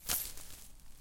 Knight Left Footstep Forest/Grass 4 (With Chainmail)
A chainmail wearing knight’s footstep (left foot) through the woods/a forest. Originally recorded these for a University project, but thought they could be of some use to someone.